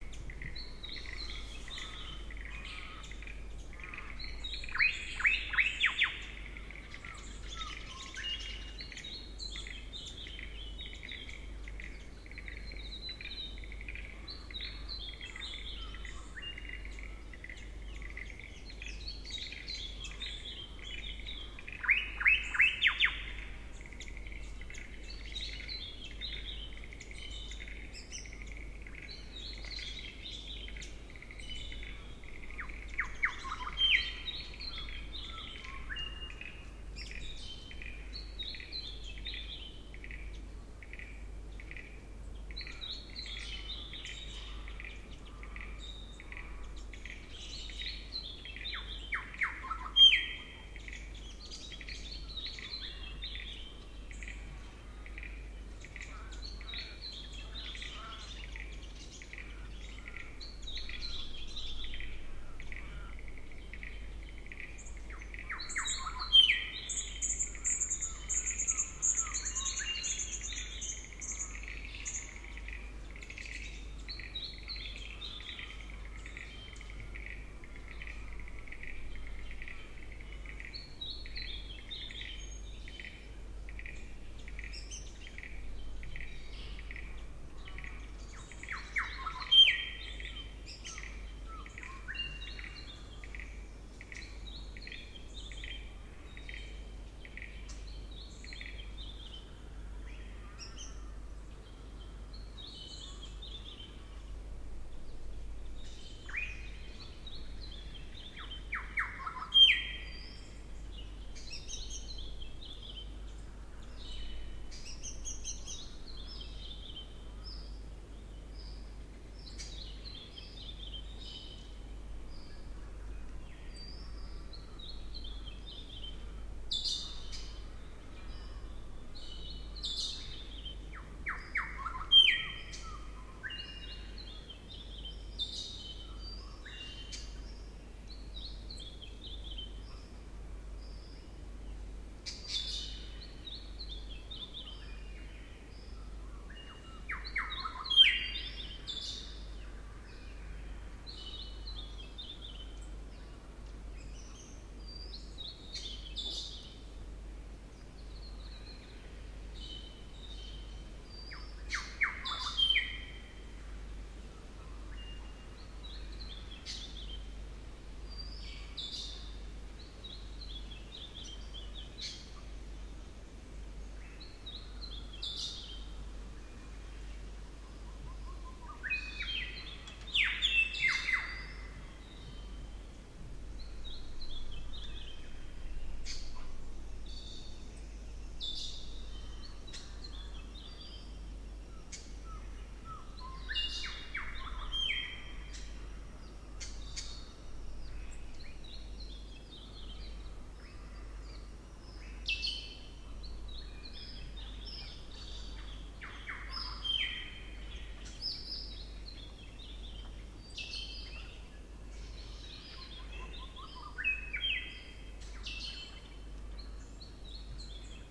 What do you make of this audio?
Bruny Island Ambience 1
Shrike Thrush, distant ravens, Tasmanian Froglet (0.00-1.40), New Holland Honeyeater (2.06, 3.21).
Recorded 7.27 am, 31 July 2015, at Inala, Bruny Island, Tasmania, on a PMD 661 using a Rode NT55.
bird-song, Bruny, Island, Tasmania